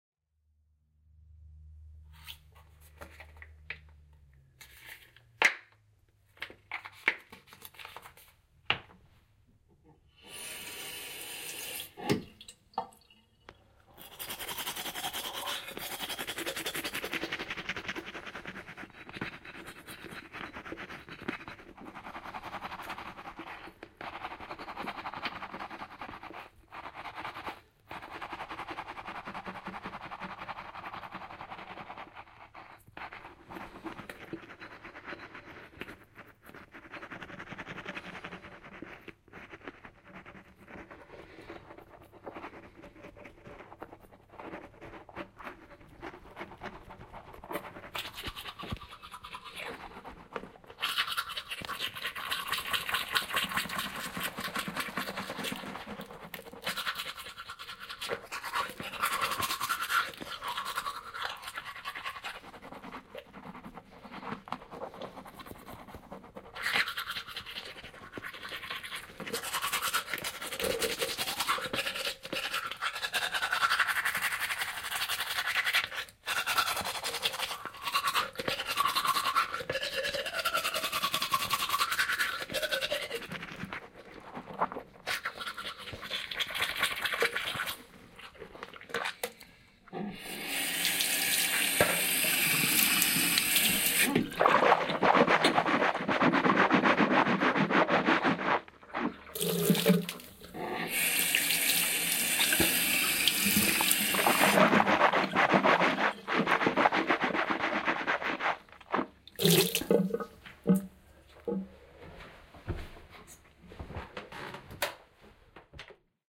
Tooth brushing
Testing my binaural mics.
Listen with headphones for binaural effect.
Recorded with Soundman OKM II mics and a ZOOM H2N.
bathroom, binaural, stereo, toothbrush, tooth-brushing